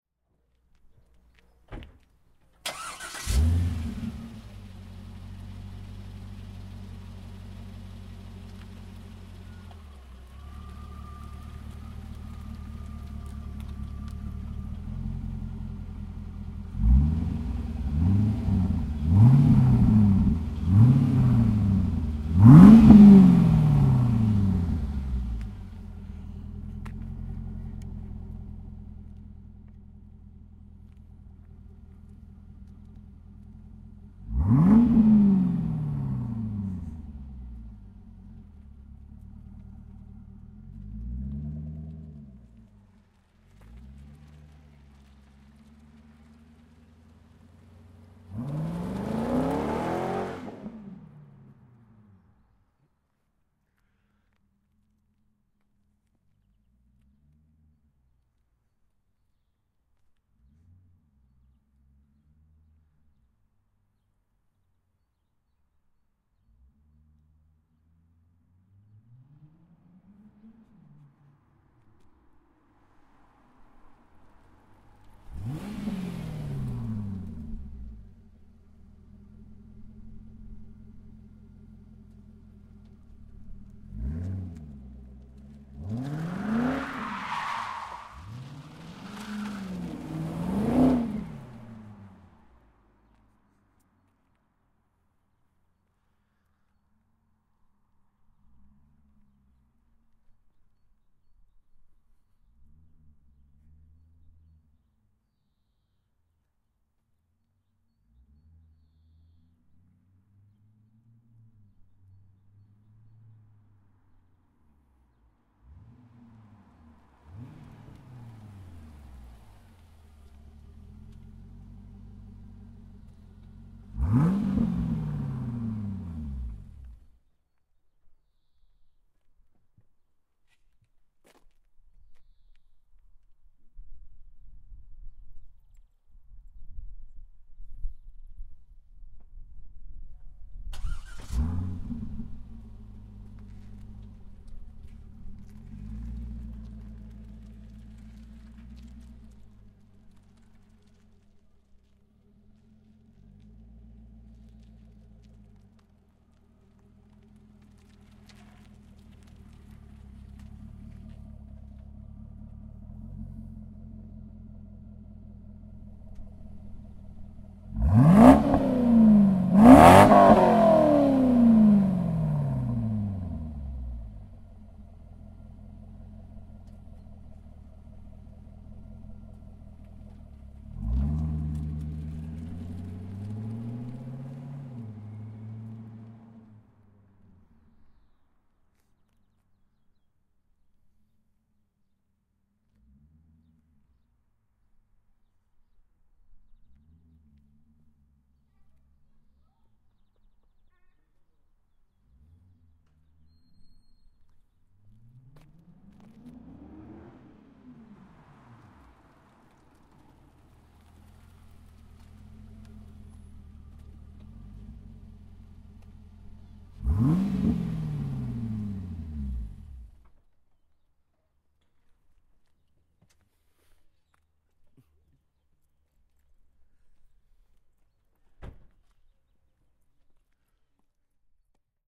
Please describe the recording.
car start, accelerate, run R/L and L/R, stop the engine, start again,comes near the H4 in a fixed point, accelerate , screeches, run, stops and close the door.